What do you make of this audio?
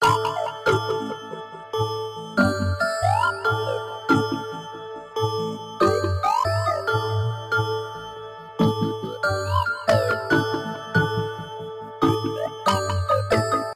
bell synth loop 140bpm
ambient, bell, breakdown, pad